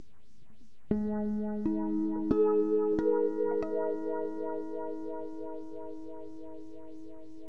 Pizzecato Aadd9 overtone wah
This is Pizzecato Aadd9 overtone with added wah effect
guitar, experiment, chord